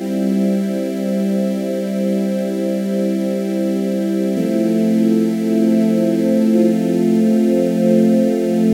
Part of the Epsilon loopset, a set of complementary synth loops. It is in the key of C major, following the chord progression Cmaj7 Fmaj7. It is four bars long at 110bpm. It is normalized.
110bpm, synth, pad